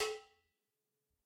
Some dirty drum sounds I sampled from one of my recordings.
dirty, Drum